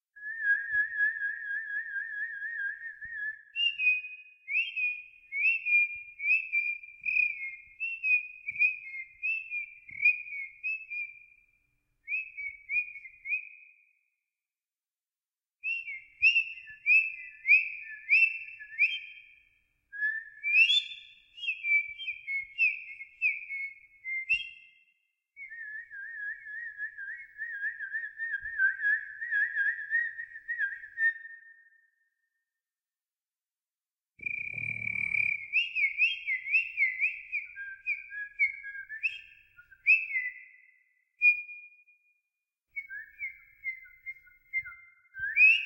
Bird Chirping Rainforest Sound
I recorded my whistle with this one too. I have the ability to make reverse whistling sounds. I just killed background noises in my bedroom using my audio editing program and I added a reverb for that echo in the forest sound.
This is a bird making different sounds.
bird, chirp, chirping, echo, forest, rain, rainforest